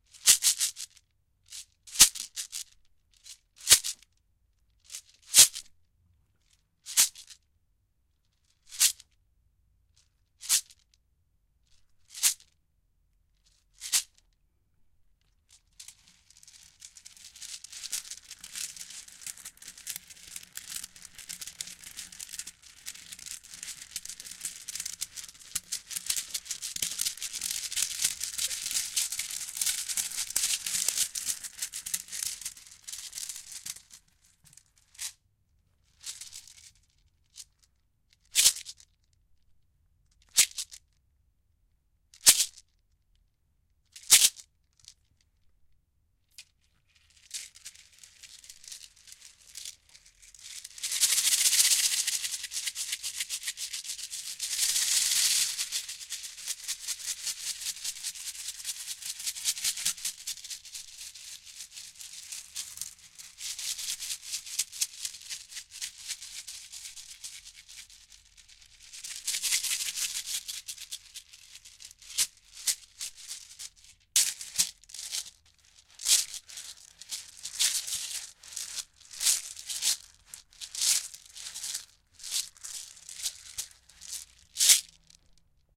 Shakes recorded at different intensity and rythm.
percussive, rhythm, shakes, shaking